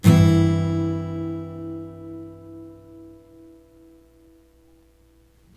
Yamaha acoustic guitar strummed with metal pick into B1.
guitar, 6th, acoustic, amaha, chord